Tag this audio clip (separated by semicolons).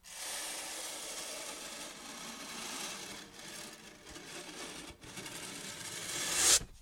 hiss; metal; cloth; object; swish; fabric; slide